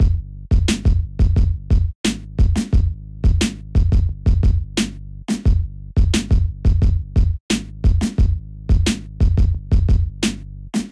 Rap beat with little bass.